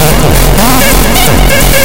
FLoWerS 130bpm Oddity Loop 016
Another somewhat mangled loop made in ts404. Only minor editing in Audacity (ie. normalize, remove noise, compress).
electro, resonance, experimental, loop